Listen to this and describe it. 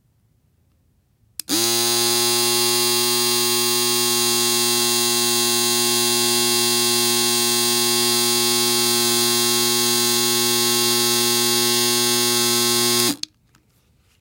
machine sounds 3 (electric razor 2) 07
A recording of an electric razor my friend and I made for an audio post project
machine, electric-razor, mechanical